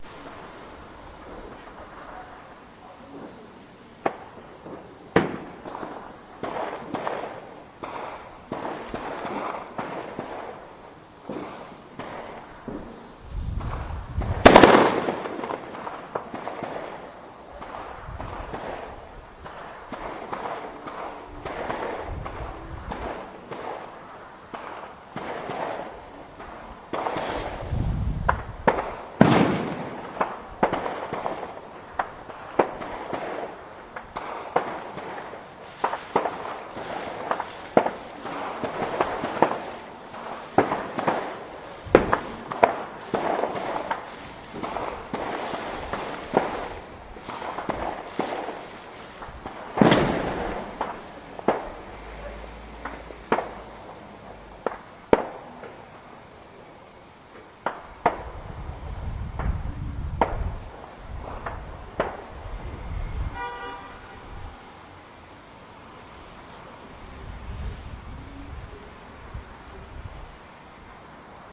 The sounds of a fireworks display in 2009.